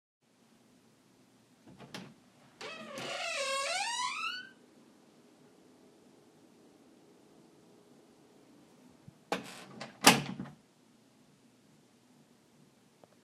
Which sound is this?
creaking door 4
Open and close a squeaky wooden door
wooden, door, wooden-door-closing, creaky